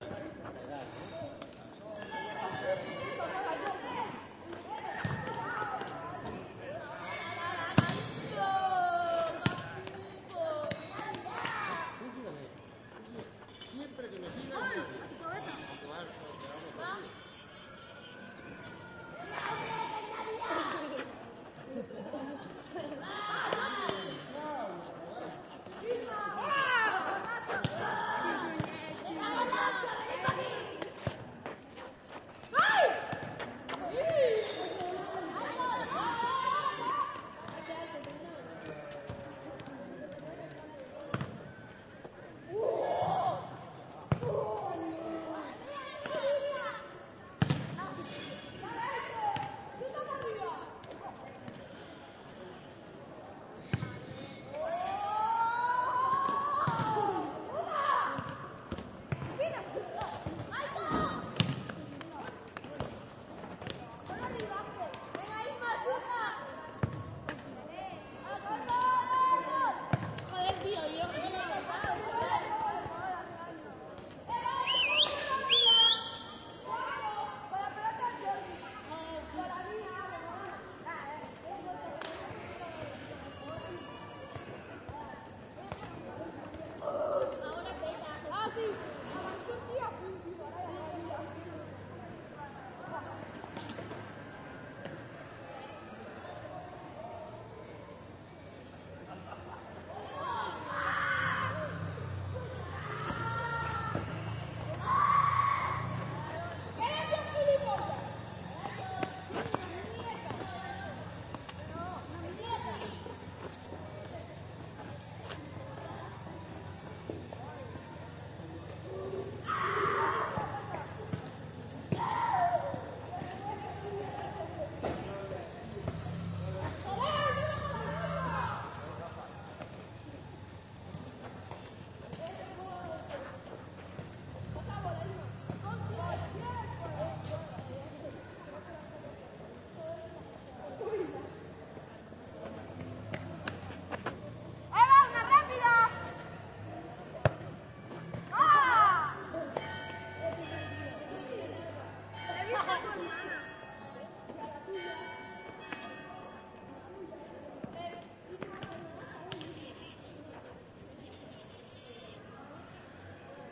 Children playing football in a little square (Barcelona).
Recorded with MD MZ-R30 & ECM-929LT microphone.